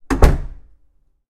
Door slam 1
A simple door slam recorded in mono with an NT5 on to Mini Disc.
door, slam, wooden